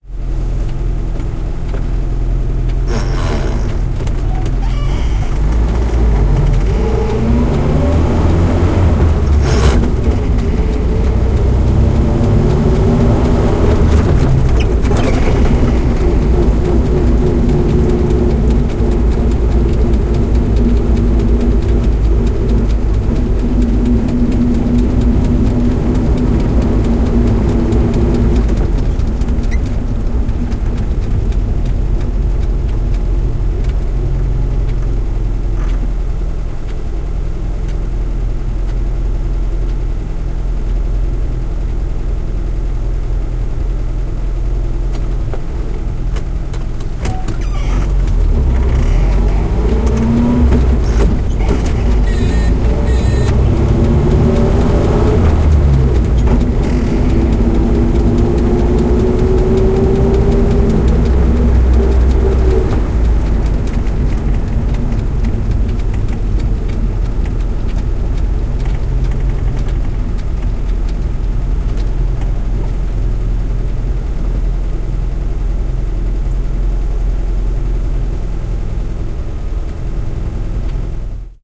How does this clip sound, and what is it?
uaz469 inside 061015
Recording from inside the car named UAZ 469, russian military jeep. Car is going in late morning in city with calm traffic.